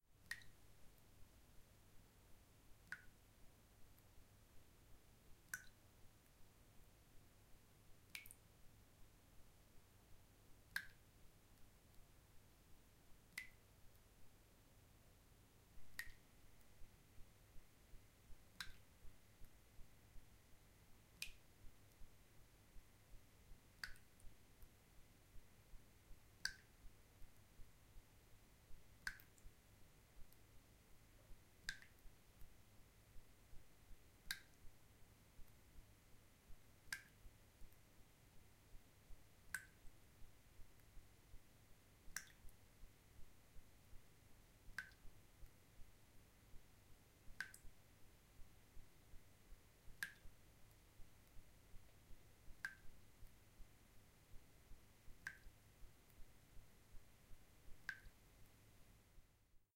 Dripping, Slow, A
Raw audio of slow dripping in a sink.
An example of how you might credit is by putting this in the description/credits:
And for more awesome sounds, do please check out my sound libraries or SFX store.
The sound was recorded using a "H1 Zoom recorder" on 5th April 2016.
Random Trivia: This "Dripping" pack marks the anniversary of my first uploaded sound.
drip, dripping, drop, sink, slow, water